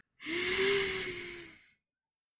taking air
me taking some air